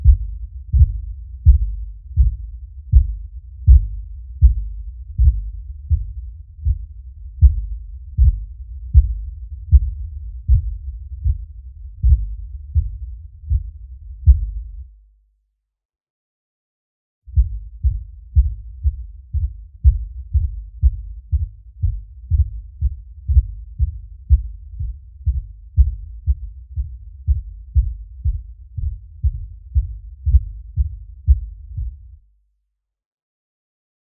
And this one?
Footsteps Wood Floor Slow Male Heavy
Floor, Office, Footsteps